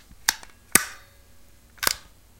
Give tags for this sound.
noise; stapler

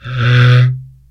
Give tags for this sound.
instrument; daxophone; friction; wood; idiophone

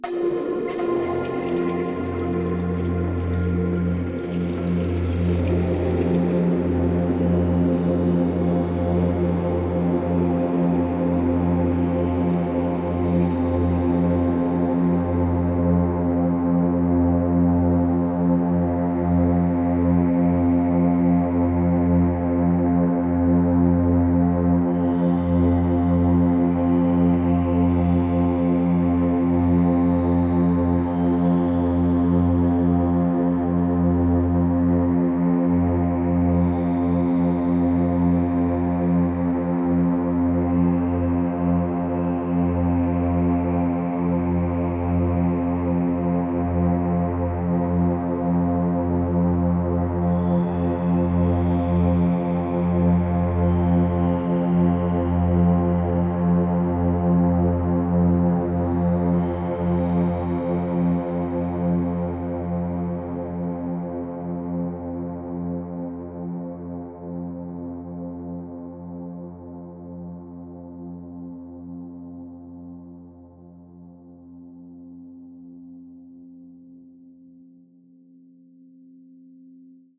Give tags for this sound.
soundscape; water; pad; artificial; drone; multisample; space

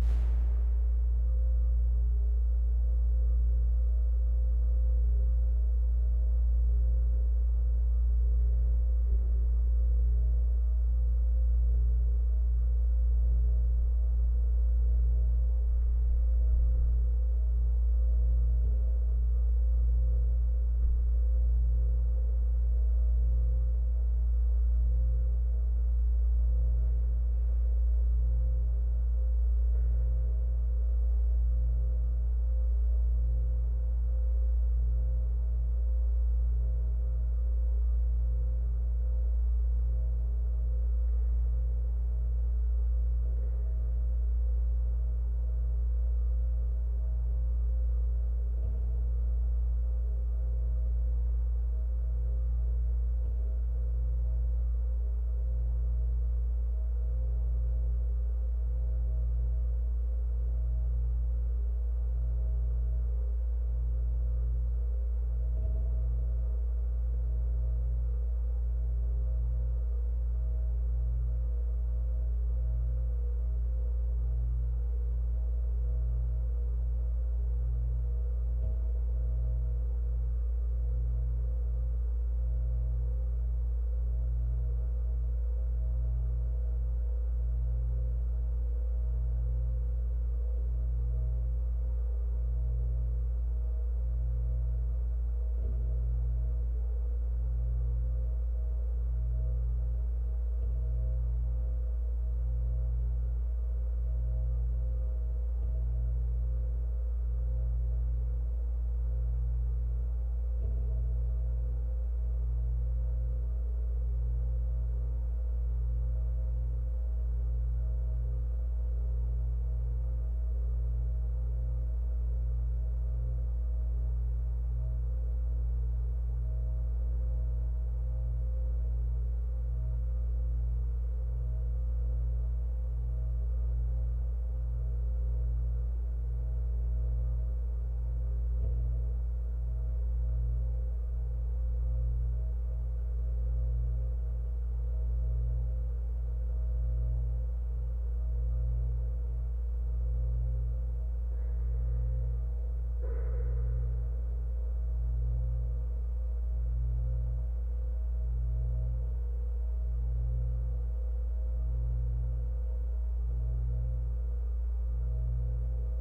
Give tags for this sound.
Ambient; Atmosphere; Drone; Eerie; Sound-design; contact-mic

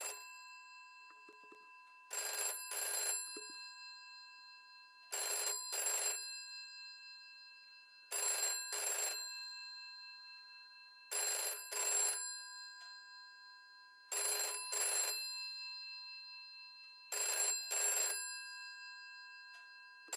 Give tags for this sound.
new; nzpo; phone; ringing; zealand

